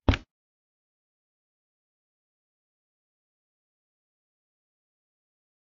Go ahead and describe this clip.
golpe ente pared
being hitting a wall